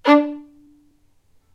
violin spiccato D3
spiccato, violin